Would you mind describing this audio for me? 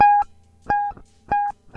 solo loops 6
nice solo guitar with a friend